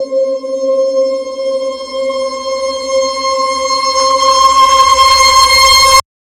cinematic, sustained, middle, processed, granular, pvoc, soundhack, abletonlive, maxmsp
maxmsp, processed, pvoc, sustained, cinematic, soundhack, granular, middle, abletonlive